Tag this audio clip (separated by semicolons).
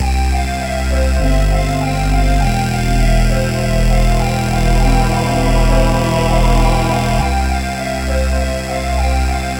experimental; Hip; beat